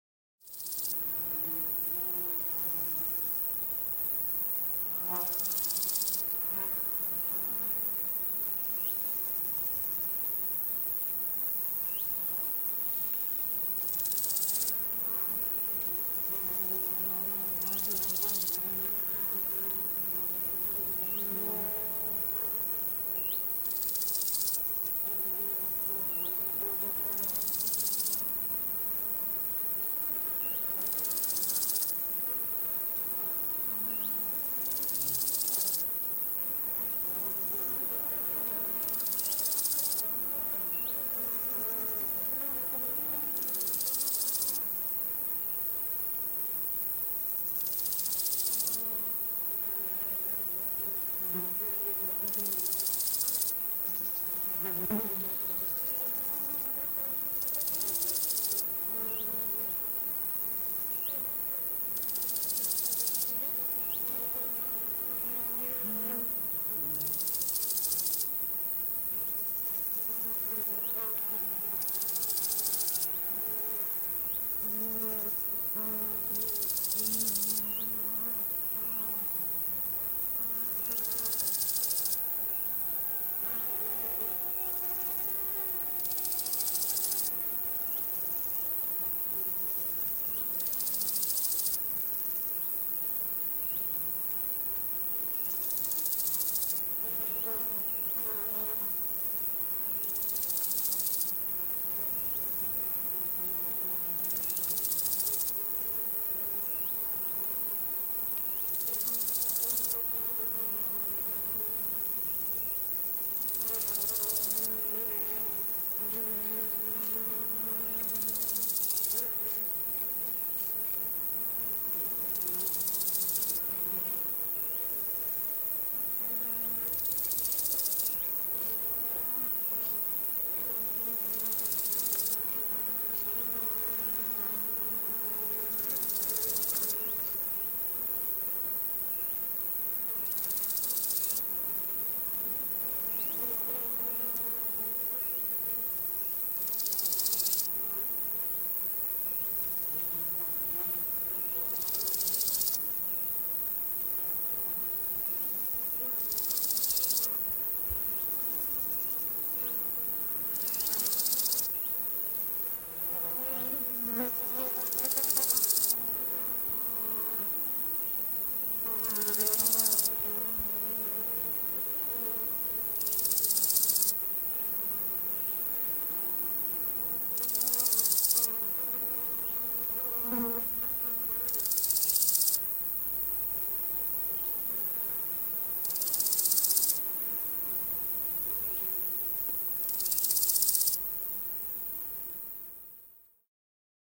Niitty, kesä, hyönteiset, sirkat / Meadow, field in the summer, insects, crickets, some birds in the bg
Eloisa kesäniitty. Hyönteisiä ja sirkkoja. Vähän lintuja taustalla.
Äänitetty / Rec: DAT | Paikka/Place: Suomi / Finland / Lohja, Jantoniemi
Aika/Date: 08.08.1997